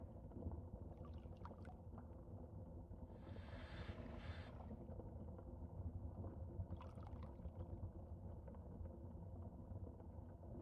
An underwater ambience.
Used the following sounds:
With thanks to the creators of the original sounds.